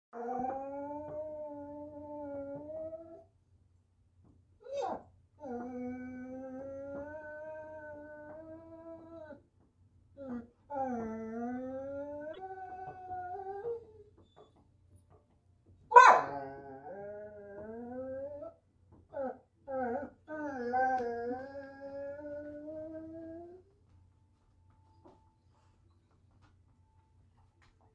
A redbone coonhound who would very much like to be let outside to chase a squirrel, but sadly will not be.